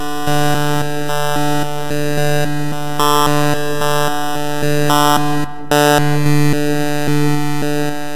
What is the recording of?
Image Sonification 006
Image exported to Raw format with the Photoshop application, imported to audacity which has been modified using plugins.